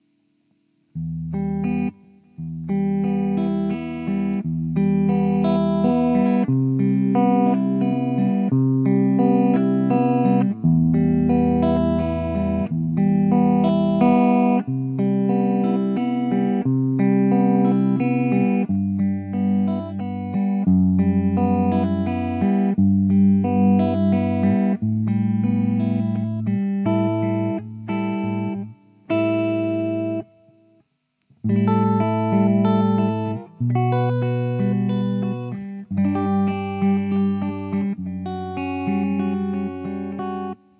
Original live home recording
chords, guitar, improvisation, rhythm